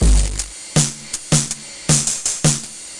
micro beat 03
This is an old Boss drum machine going through a Nord Modular patch. One bar at 90BPM.
808, beat, digital, drum, loop, noise, nord